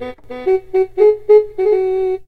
Sounds of electronic toys recorded with a condenser microphone and magnetic pickup suitable for lofi looping.
electronic, lofi, loop, loops, toy